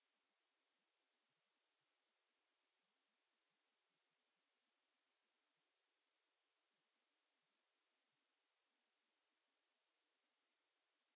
watermark.signature
If mixed with a common audio file (see examples in this pack) this file could be used as a digital watermark. You can see the watermark looking at the spectrogram. In Audacity, for example, select the spectrum view instead of the more commonly used waveform view. The original stereo audio file was produced with GNU/GPL Enscribe 0.0.4 by Jason Downer, then converted to converted to a single channel with Audacity
enscribe, steganography, watermark